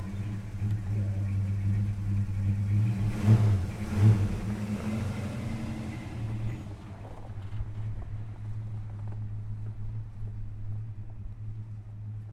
Pickup Ford 62 DriveAway
1962 Ford Pickup Truck drive away on dirt and gravel road.
exterior, dirt, pickup, road, truck, off, driving